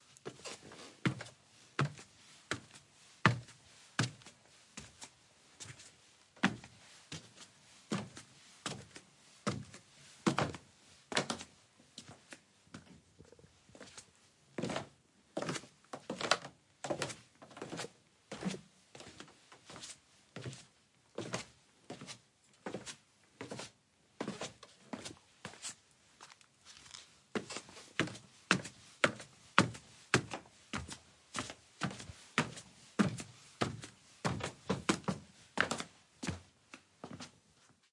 Footsteps on the creaking wooden stairs up and down.